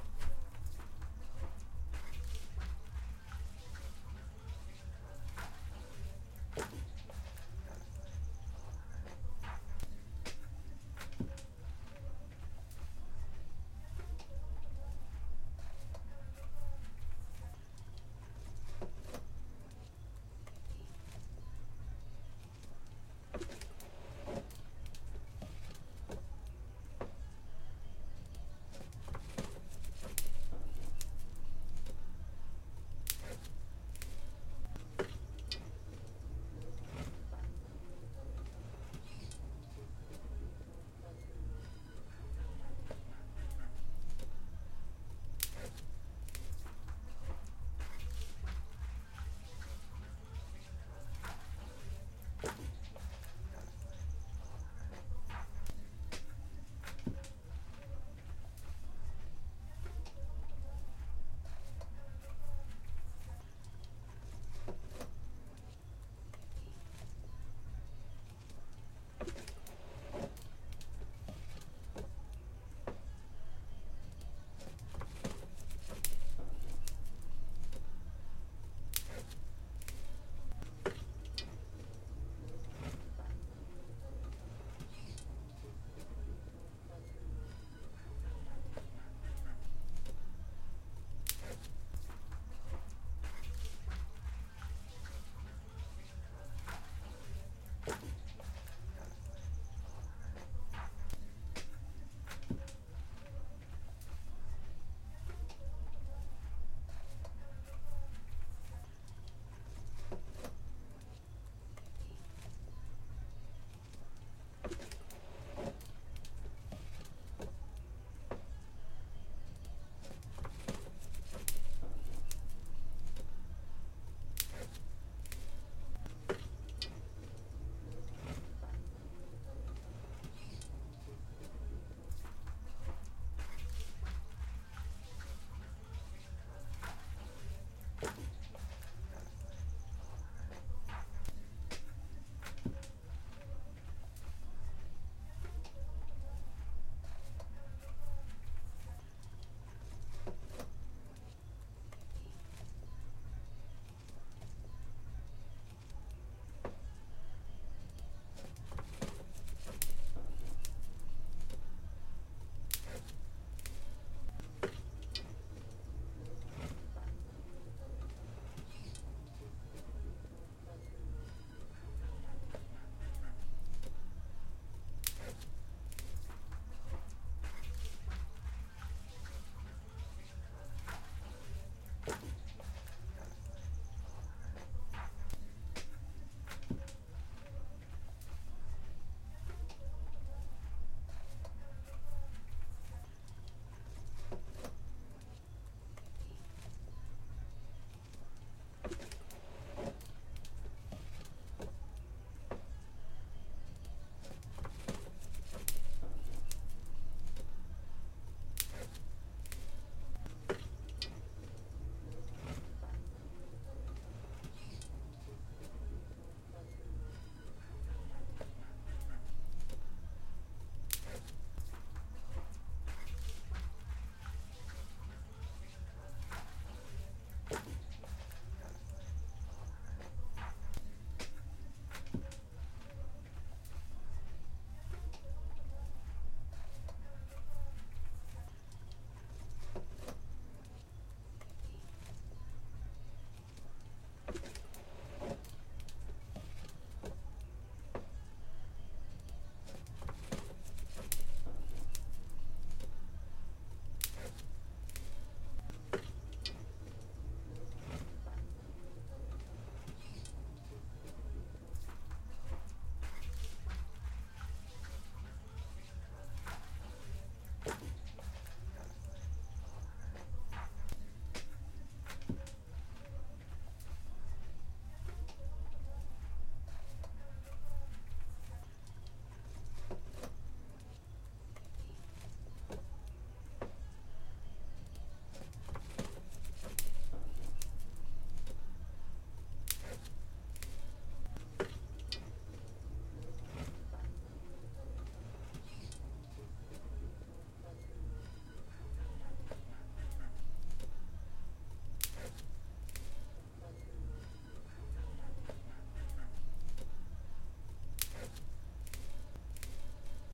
ambience rural kitchen silence
Ambience sound of a woman cooking in a rural kitchen of a small village of Nicaragua. You can hear logs in a stove, fire sparks, hot oil in a pan, hi-fi sounds, parrot, chicken, crickets, birds, television soap opera
a, oil, sparks, crickets, birds, logs, sounds, chicken, parrot, opera, television, soap, hi-fi, fire, stove, pan, hot, kitchen